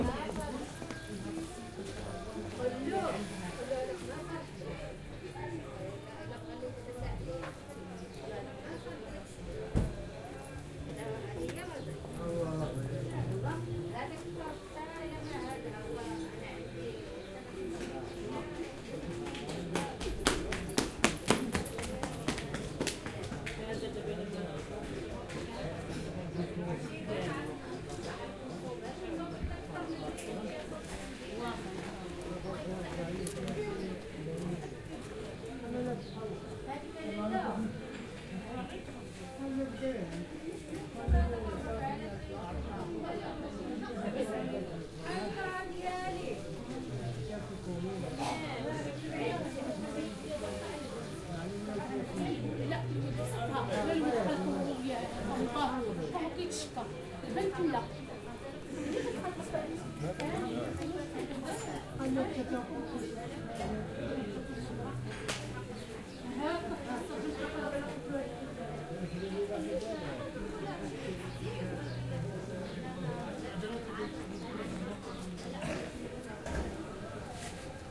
moulay idriss people2
People talking in Moulay Idriss, Morocco
voice, kids, field-recording, morocco, people